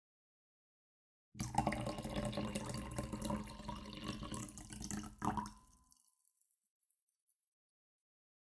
Pouring liquid into the liquid.